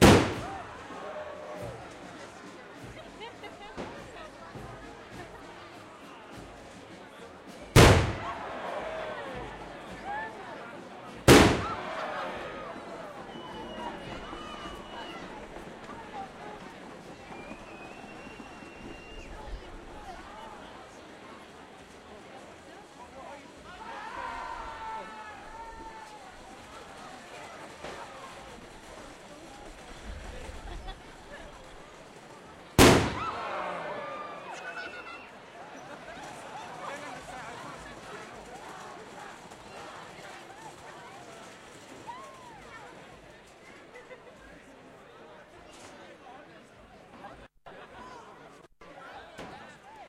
lewes bangs & cheers
Lewes bonfire night parade, England. Crowds of people dress in historic costumes and burn effigies of the pope and political leaders. Lots of bangs, fireworks going off, chanting, shouting.
lewes, crowd, fireworks, noisy, bangs, march, people, bonfire